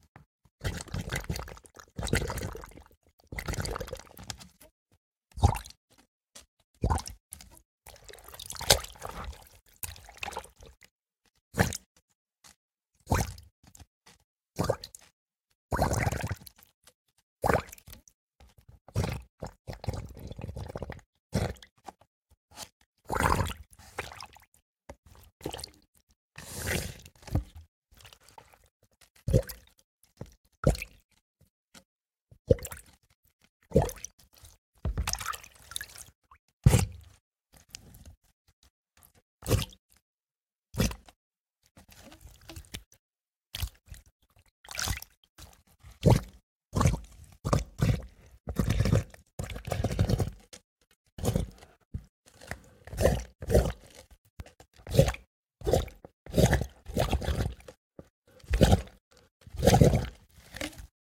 Wet BubblesNSplashing
This is part of the Wet Sticky Bubbly sound pack. The sounds all have a noticeable wet component, from clear and bubbly to dark and sticky. Listen, download and slice it to isolate the proper sound snippet for your project.
water, bubble, splash